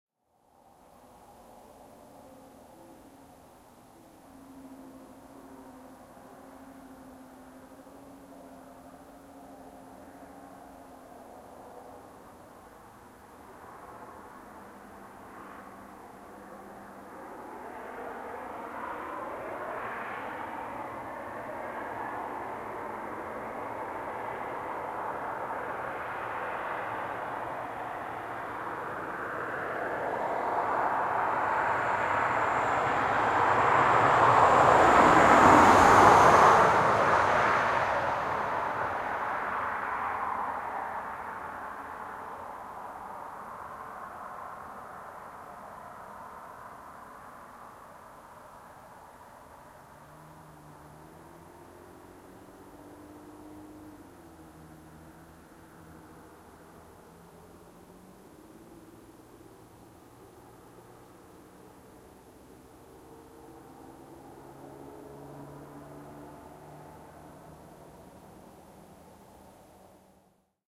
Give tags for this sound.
highway car driveby field-recording

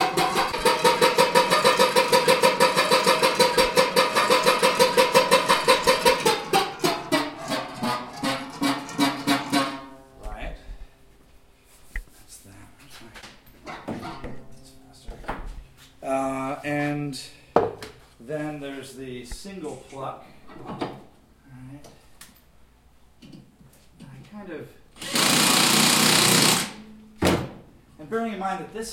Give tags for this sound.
Russolo Intonarumori futurist

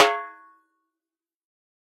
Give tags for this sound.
1-shot snare multisample drum velocity

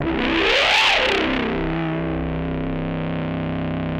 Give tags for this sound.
analog bassline bass distorted wave dark hit

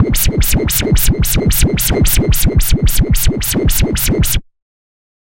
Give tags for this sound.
110 Skrillex bass beat bpm club dance dub dub-step dubstep effect electro electronic lfo loop noise porn-core processed rave sound sub synth synthesizer techno trance wah wobble wub

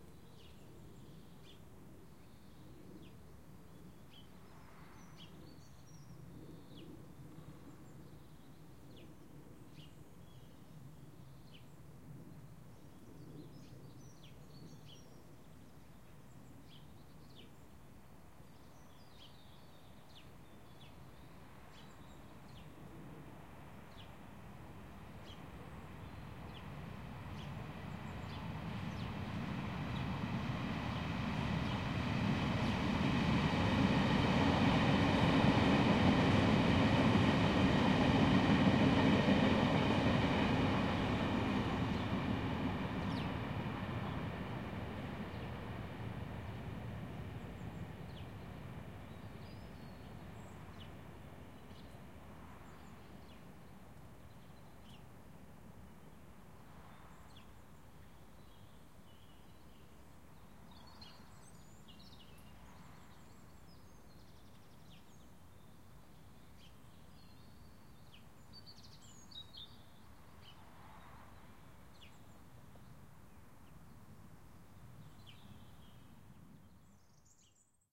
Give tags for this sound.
Train Birds Pass Residential Ambience Tube Street Quiet

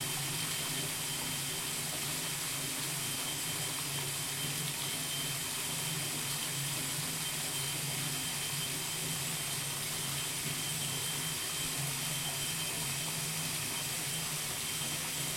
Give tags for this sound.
High Water Pitch

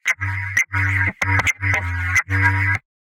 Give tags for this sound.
pad sci-fi synth

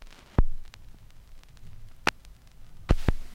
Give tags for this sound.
glitch noise

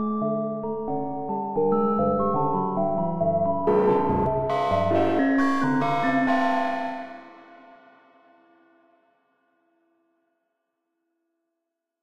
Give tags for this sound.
time-stretched Madrona-Labs electronic Aalto soft-synth prepared-piano processed

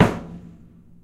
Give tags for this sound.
drums live

